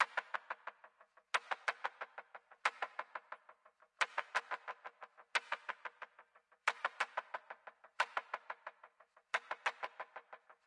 Don Gorgon (Efx)
Don Gorgon F 90.00bpm (Efx)